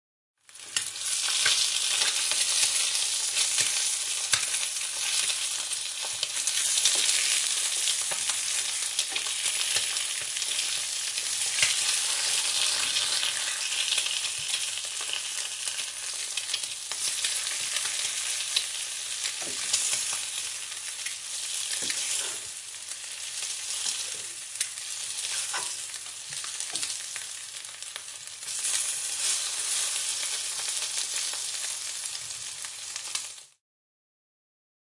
Nice sample of baking fried eggs, slighty compressed and enhanced
baking, cooking, eggs, fried, kitchen